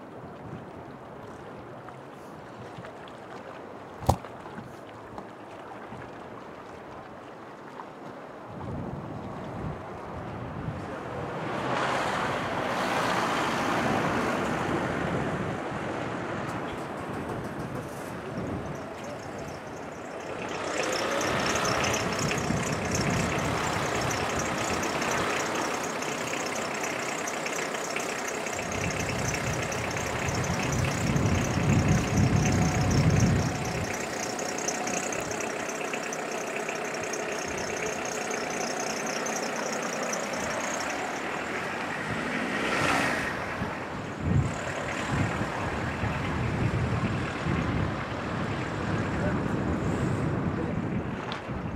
FX - vehiculos